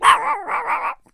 A small dog is barking.